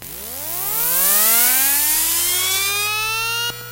hdd external spinup
An external 3.5 inch USB hard drive spinning up, recorded with an induction coil microphone.
hdd, sci-fi, induction-coil, hard-drive, field-recording, hard-disk